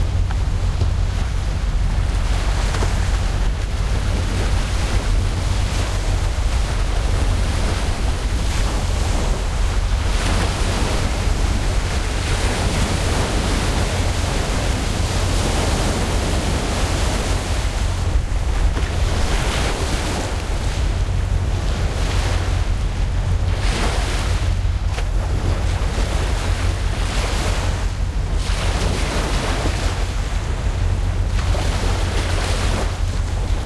waves and cargoship
Big Cargoship on the Elbe, Hamburg
Großes Containerschiff auf der Elbe in Hamburg
field-recording, sea, containerschiff, strand, wellen, ship, shore, schiff, beach, cargoship, waves, coast